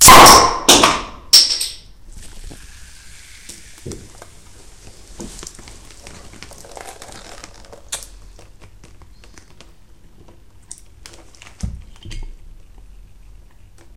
Ottakringer beer being opened and producing a massive plopp